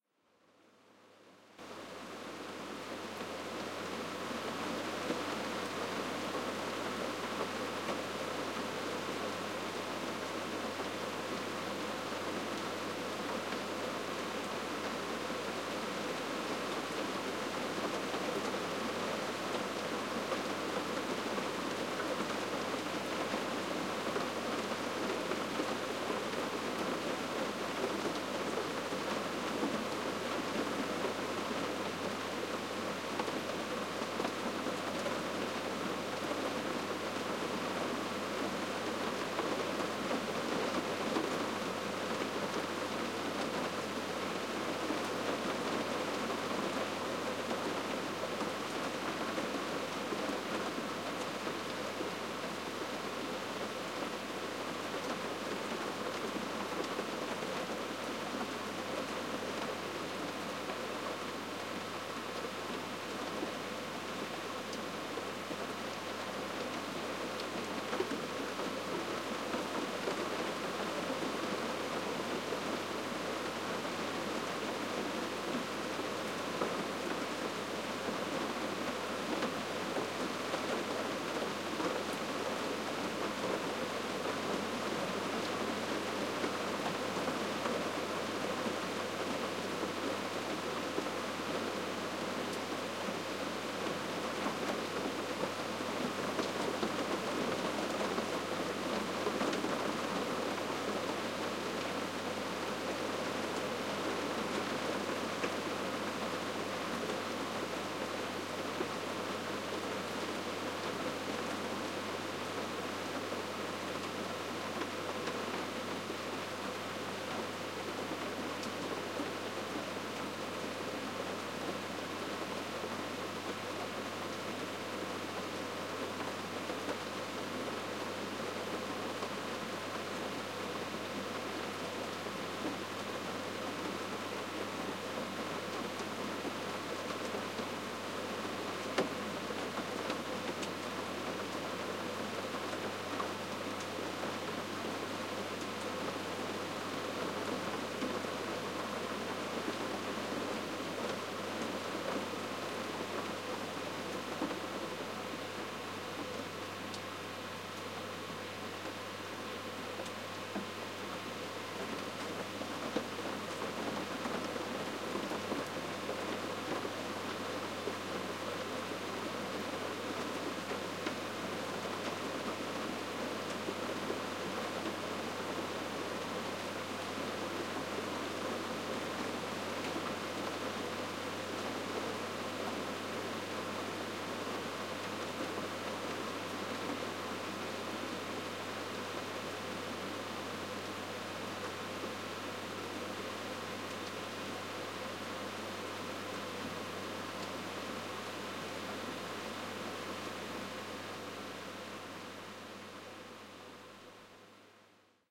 Shepherd's Hut Rain
Rain on the roof of a remote shepherd's hut
england, hut, location, rain, remote, roof, shepherd, shower